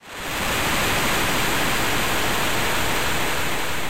Noisy/vehicle scene
It can sound like when you're in the car.
Created using Chiptone by clicking the randomize button.
soundeffect, digital, truck, ambience, arcade, Chiptone, video-game, fx, car, driving, effect, pinball